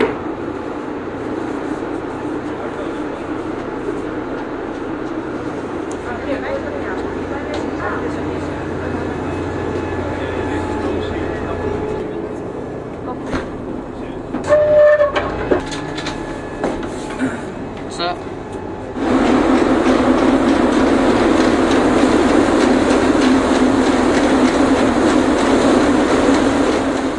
London Thames Ship
London, Thames Ship. It starts with silence outside, then people boarding, indistinguishable voices, large door creaking, water and engine sound.